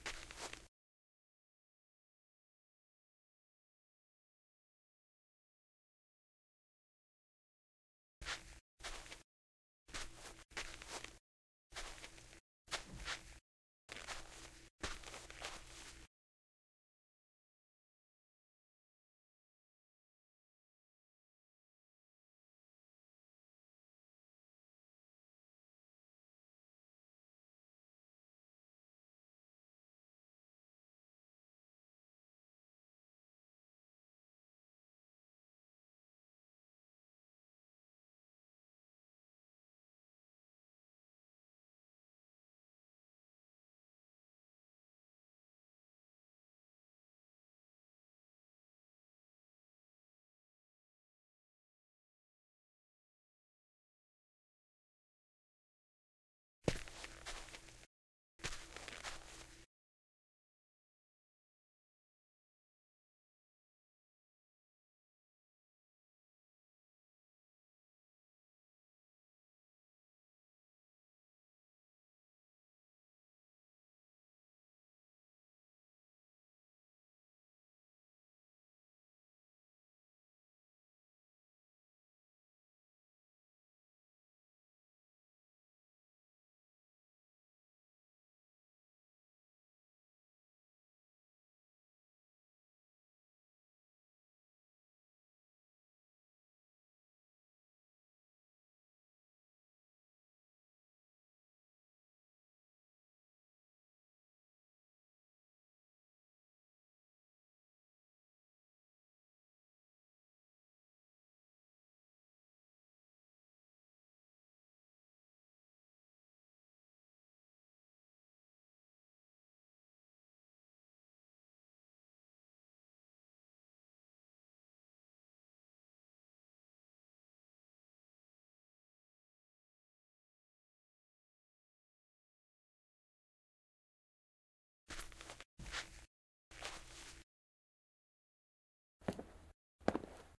Extended foley performance for the movie "Dead Season." See filename for sync point.